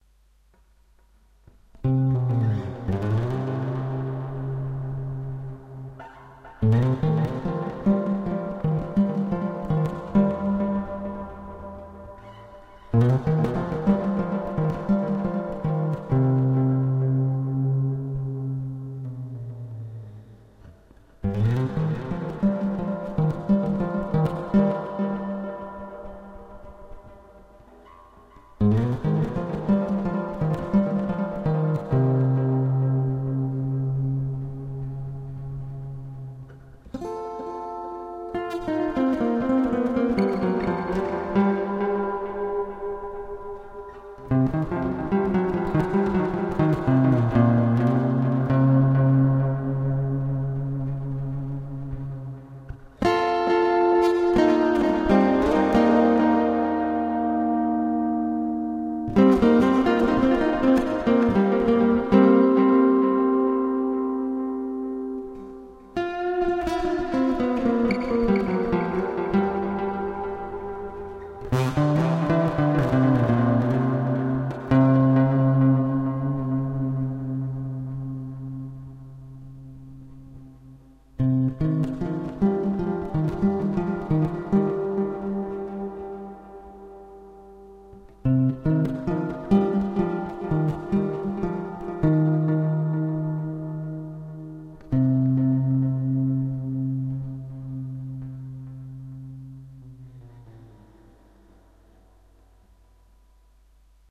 Atmospheric guitar sound
Software: Guitar Rig.
Atmospheric acoustic music with powerfull reverb effect.